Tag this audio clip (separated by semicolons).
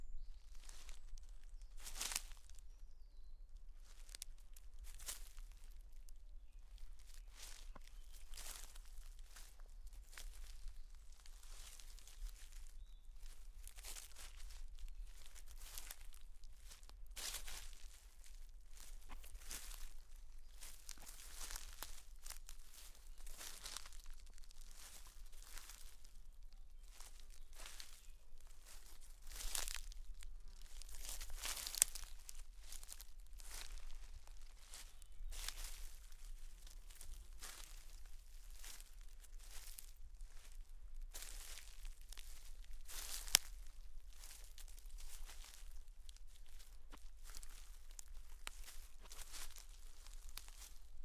barefoot; birds; footsteps; forest; grass; leaves; walking; woods